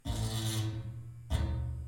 loud n short - loud n short
Electric shaver, metal bar, bass string and metal tank.
shaver, motor, electric, Repeating, metal, metallic, processing, tank, engine